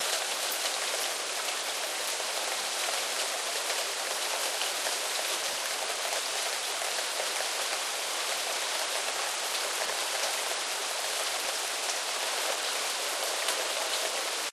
Steady rain pouring down on leaves and trees.
Snapping and popping, trickling and splattering rain.
Actually recorded next to some trees by houses, so Drainpipe dripping can be heard also.
Somewhat muffled, reduced the high range to filter out backround hiss of the city.